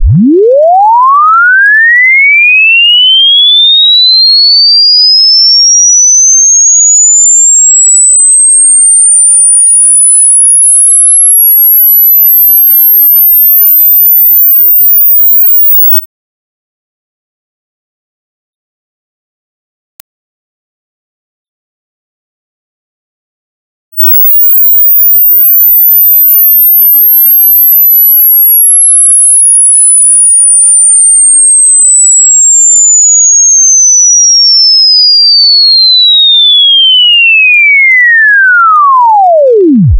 The spectrum of a wave. Skirl to bass sound and bass sound to skirl.